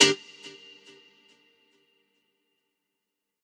Guitar Skank G min
A Guitar Skank that you can use for any of your songs